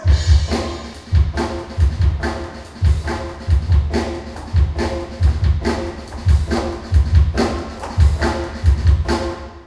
Drums played in thetre LIVE!